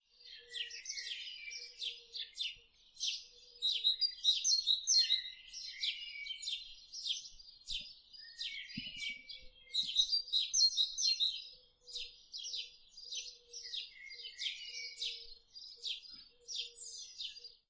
Birdsounds recorded with Zoom H5 and post-processed with Audacity. I think there are sparrows and a great-tit. Correct me if i am wrong, i am not an expert in bird sounds :) Enjoy!
bird, field-recording, forest, tweet, nature, birdsong, birds, sparrows, spring, sparrow, great-tit